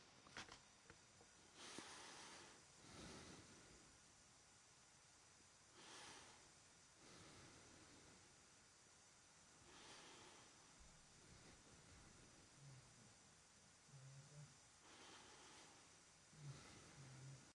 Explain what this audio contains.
Normal soft breathing
Just everyday restful breathing. Nothing heightened.
breathing, breathe, breath